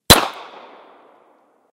Makarov Shoot
Makarov Pistol gunshot sound effect.